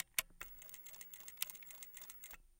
son de machine à coudre